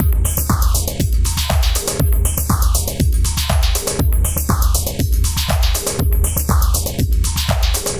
WhyDoWeLoop 120 bpm
120-bpm, drum-loop, drums, loop, percussion, rhythm